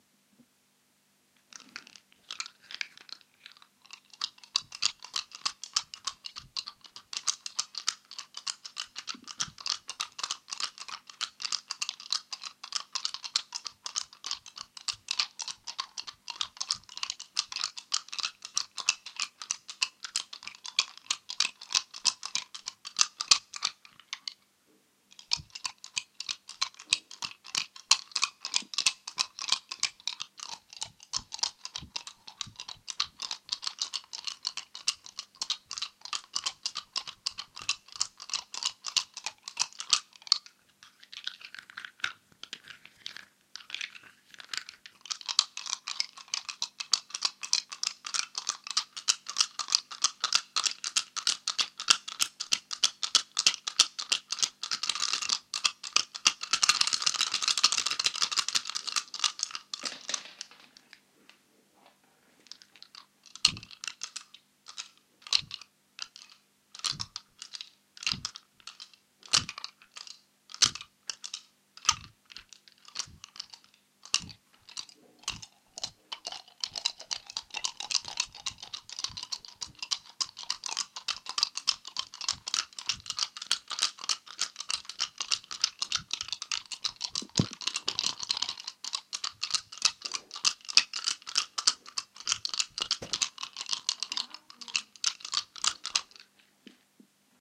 Nutshake WET
This is me shaking 5 hazelnuts in my hands. Sounds a bit like dice. There is a unprocessed version of this recording as well.